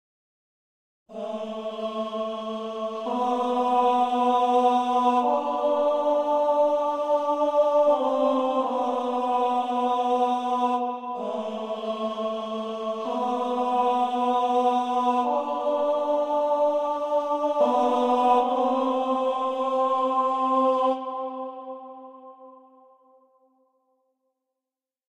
Men Tenor Choir
I Played a simple cadence phrase with choir sound. First Women, then added men tenor and men base, then together all in one pack. Done in Music studio.
cathedral, choir, men, men-tenor, tenor, Tenor-choir